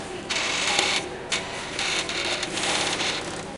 Metro station Barceloneta in Barcelona. The entrance gates at the metro stations in Barcelona. The typical sounds of automated ticket machines. Recorded with a MD recorder and a Electret Condenser Microphone with a Directive angle of 120°.